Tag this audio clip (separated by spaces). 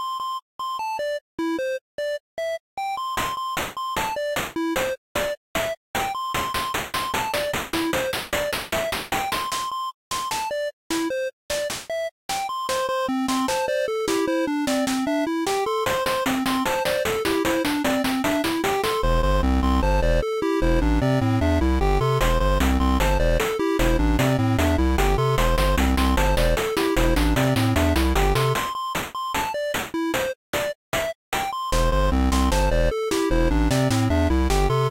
Pixel loop